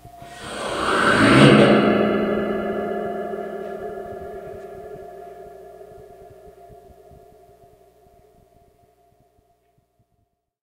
CD STAND OF DOOM 073
The CD stand is approximately 5'6" / 167cm tall and made of angled sheet metal with horizontal slots all the way up for holding the discs. As such it has an amazing resonance which we have frequently employed as an impromptu reverb. The source was captured with a contact mic (made from an old Audio Technica wireless headset) through the NPNG preamp and into Pro Tools via Frontier Design Group converters. Final edits were performed in Cool Edit Pro. The objects used included hands, a mobile 'phone vibrating alert, a ping-pong ball, a pocket knife, plastic cups and others. These sounds are psychedelic, bizarre, unearthly tones with a certain dreamlike quality. Are they roaring monsters or an old ship breaking up as it sinks? Industrial impacts or a grand piano in agony? You decide! Maybe use them as the strangest impulse-responses ever.
alert, audio, big, compact, converters, dreamlike, echo, edit, frontier, group, hands, huge, impact